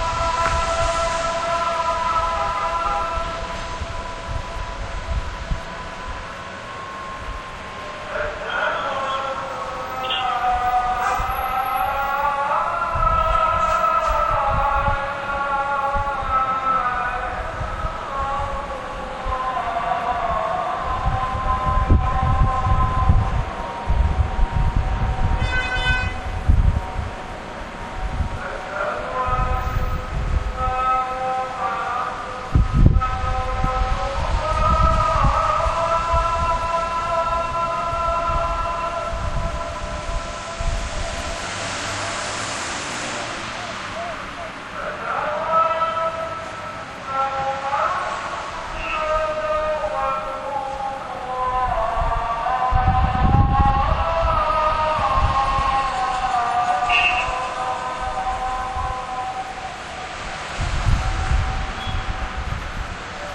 Morning call to prayer in Cairo, Egypt. Zoom H1 hand-held recorder.